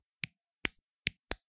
A few clips compiled into one of snapping small wire clippers.
binaural, click, clip, snap